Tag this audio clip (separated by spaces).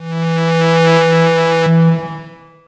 pad multisample saw reaktor